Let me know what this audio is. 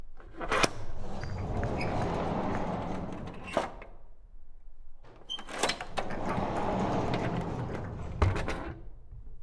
Stall Door Open And Close 02
This is a recording of a horse stall door opening and closing.
Close, Door, Horse, Open, Stall